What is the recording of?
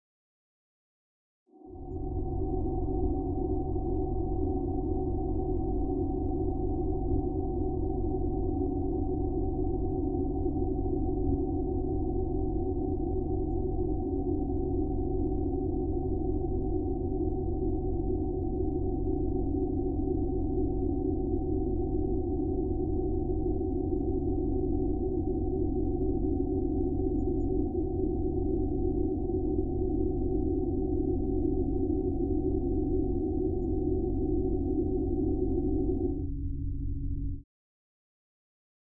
ALIEN SHIP IDLE
A semi looped piece of re-verb that sounds like an engine idling.
I created it using Steinberg Nuendo and I'm not sure where it originated from.
space, alien, sound-effect, ship, machine, engine